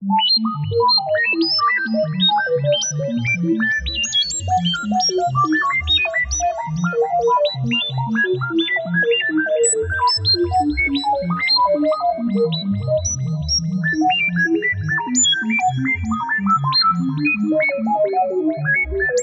Blips and Bloops
A random arpeggio of sine waves with some reverb + ping-pong delay. Can be used for sci-fi purposes (control panel, alien machinery, etc.).
notes, blip, computer, sci-fi, compute, spaceship, fi, bloops, calculator, digital, blips, calculate, machinery, noise, future, machine, random, space, sci, bloop